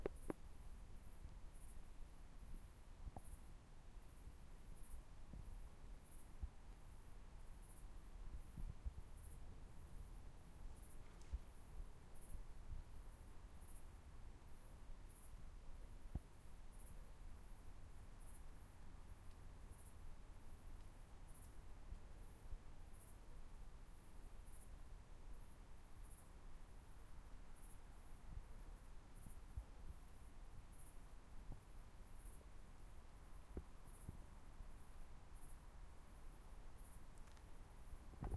Night at the River. Recorded with Zoom H2 on the 3. of October 2015 12:00 pm local time in Puch/Urstein, Austria. Not edited.
water, river, night